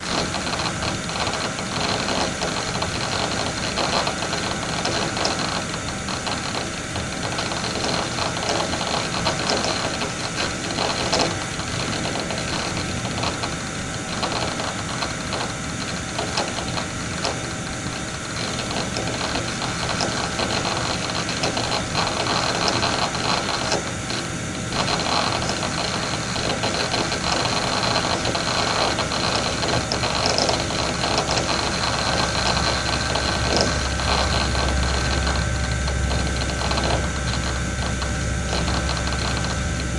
Recording computer harddisk with noises from computer fan. Microphone: Behringer ECM8000 -> Preamp: RME OctaMic -> RME QS
DeNoised with Izotope DeNoiser